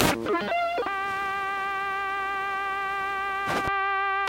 Ah Gee..... These are random samples I recorder and am such a lazy I don't want to sort them out....
1 - Could be my Modified Boss DS-1 Distortion Pedal (I call it the Violent DS - 1) (w/ 3 extra Capacitors and a transistor or two) Going throught it is a Boss DR 550
2 - A yamaha Portasound PSS - 270 which I cut The FM Synth Traces too Via Switch (that was a pain in my ass also!)
3 - A very Scary leap frog kids toy named professor quigly.
4 - A speak and math.......
5 - Sum yamaha thingy I don't know I just call it my Raver Machine...... It looks kinda like a cool t.v.
circuit-bent, ambeint, slightly-messed-with, glitch, static-crush, noise, circuits, electro